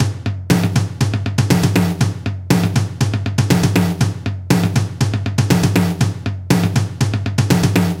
just a short drum loop :)